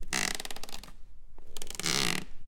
A floorboard creaking recorded with an NT5 on to mini disc